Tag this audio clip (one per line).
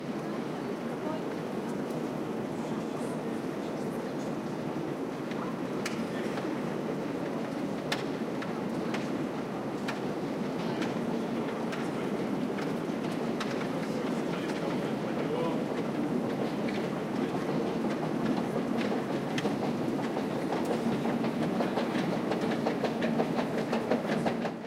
field-recording
chatting
escalator
talking
people
ambience